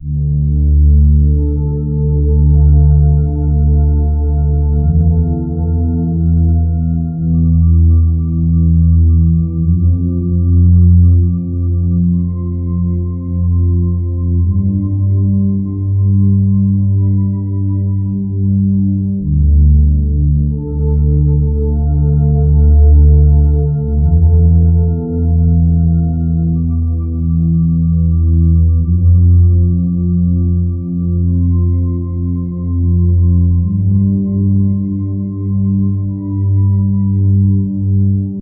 pad loop 0061 100bpm
pad soundscape loop 100bpm
100bpm, loop, pad, soundscape